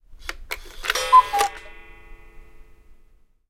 Cuckoo Clock, Single, A
Raw audio of an antique cuckoo clock chirping at the half-hour. The recorder was held about 15cm away from the clock.
An example of how you might credit is by putting this in the description/credits:
The sound was recorded using a "H1 Zoom recorder" on 22nd July 2017.
clock, cuckoo, strike, time